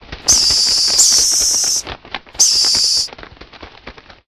hanging bats (two) with wings
science-fiction fantasy film designed